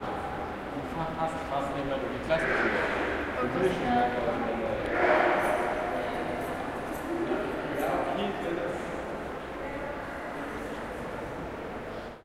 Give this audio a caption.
British Museum vox sneeze f
A loud sneeze in the ambience of the large spaces of the British Museum in London. There are voices and lots of natural reverb due to the vast size and hard surfaces. Minidisc recording May 2008.
ambience, atmosphere, british-museum, field-recording, voices